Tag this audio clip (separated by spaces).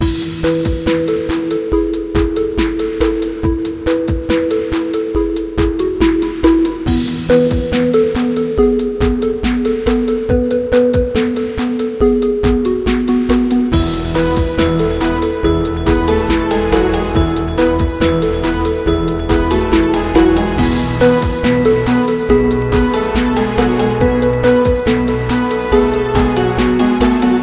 beat digital drum funny holiday loop melody old processed segment skibkamusic tune waveplay